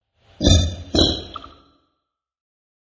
MONSTER GRUNT6
Halloween, Monster, Scary